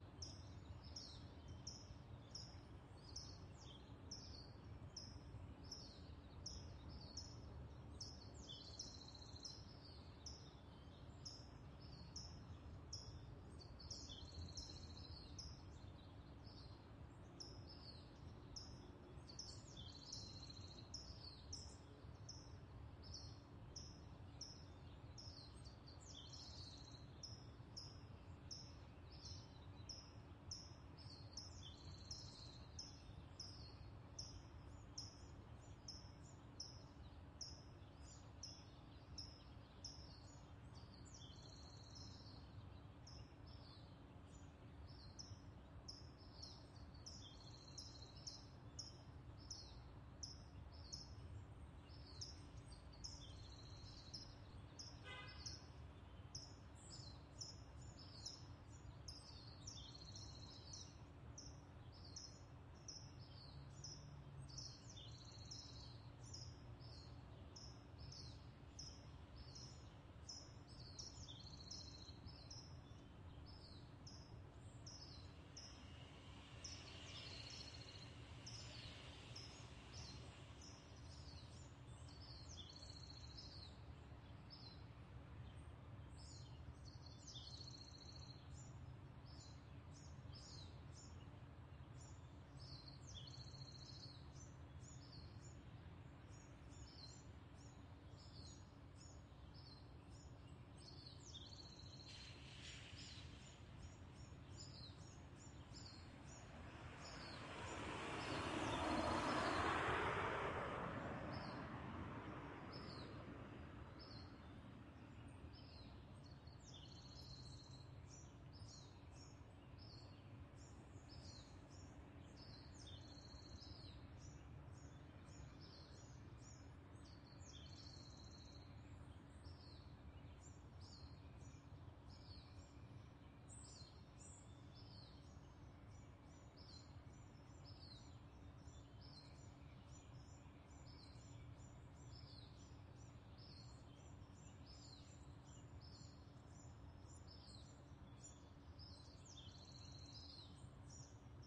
AMB Ext Residential Day Stereo 004
I revisited my Los Angeles neighborhood with a brand new stereo microphone. I've now recorded the morning birds and traffic from several perspectives.
Towards the end there's a nice car driving by.
Recorded with: Audio Technica BP4025, Fostex FR2Le
crows, morning, birds, ambience, cars, stereo, neighborhood, traffic, city, residential